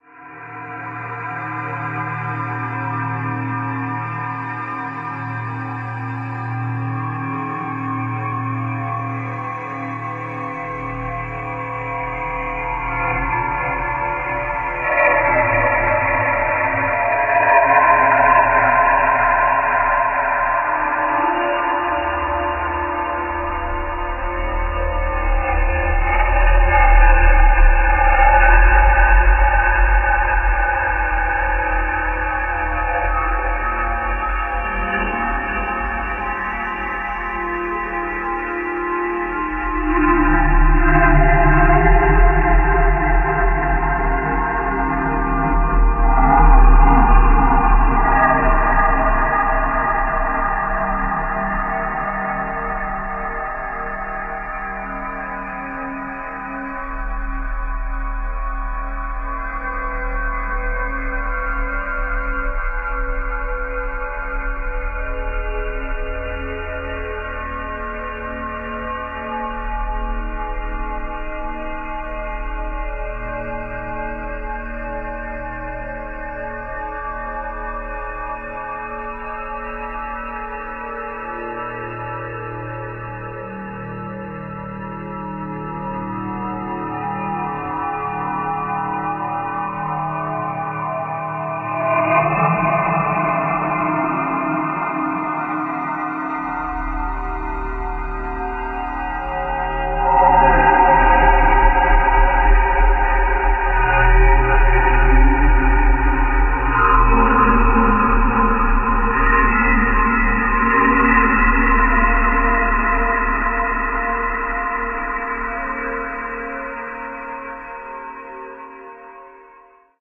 This sample is part of the "Space Machine" sample pack. 2 minutes of pure ambient deep space atmosphere. This one is more dark: lower frequencies and quite industrial.